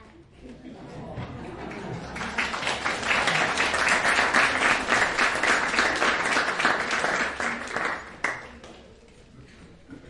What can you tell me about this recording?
241213 - Rijeka - Blumlein - Frano Živković 4
Applause during guitar concert of Frano Živković in Filodrammatica, Rijeka.
Recorded in Blumlein (2 x AKG 414XLS, figure of 8)